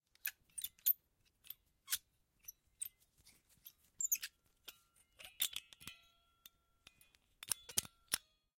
Recoreded with Zoom H6 XY Mic. Edited in Pro Tools.
Picking an old iron lock.
squeak, Lock, Key, lockpicking